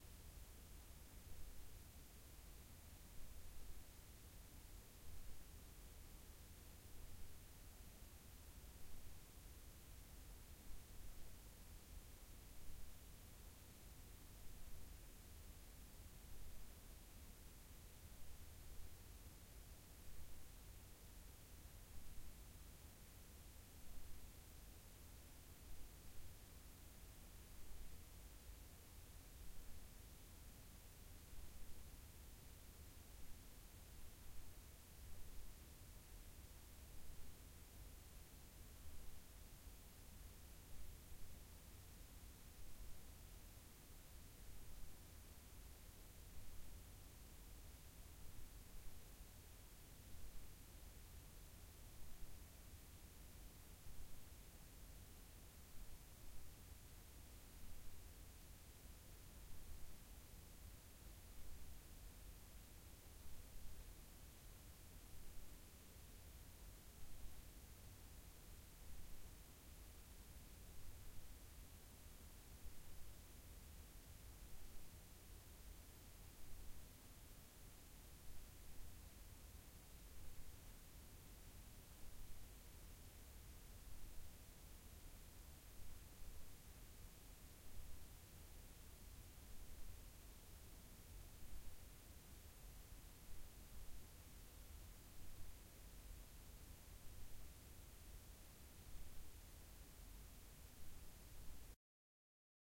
AMBLM tv studio room tone ambience noise lights off xy
Roomtone / silence / ambience / presence recorded in a tv studio with all lights off. Zoom H6 XY mics.
ambience, h6, lights, noise, off, presence, room, roomtone, silence, studio, tv, xy